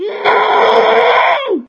Zombie sound effect for you !
beast, zombie